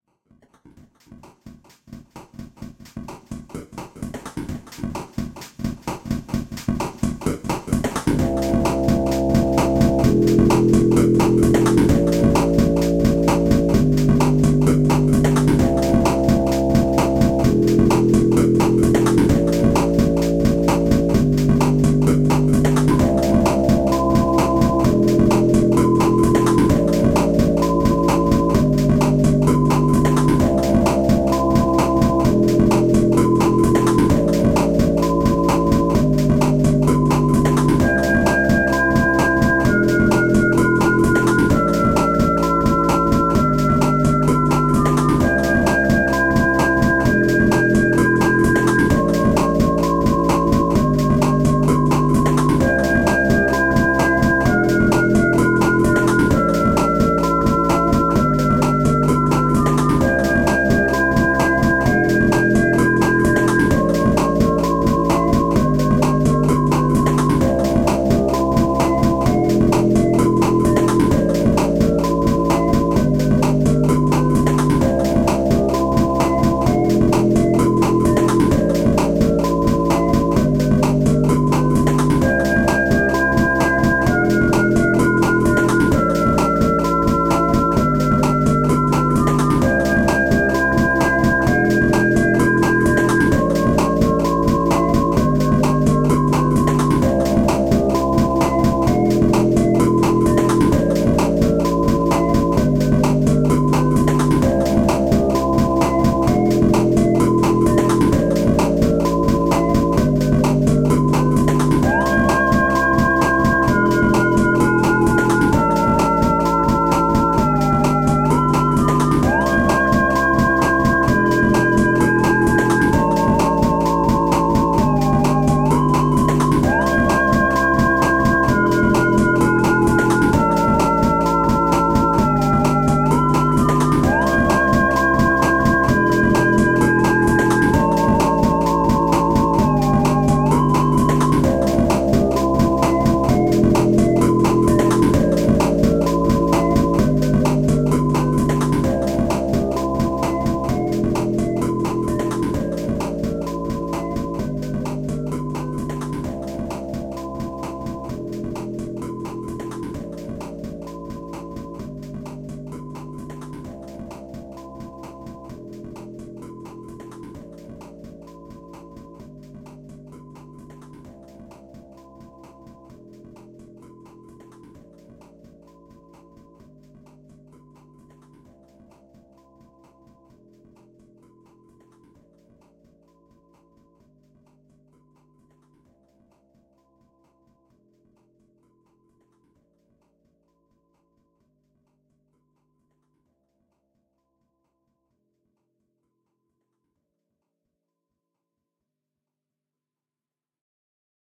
15JUN2020 ModBox Sample Recording
This recording is an organization of various loops created using ModBox.
The original ModBox project can be accessed and freely edited here:
(visible link has been shortened for ease of use, click the link to access the project)
sample, music, electronic, loop, chiptune, melody, beatbox, modbox, retro, 8bit, 8-bit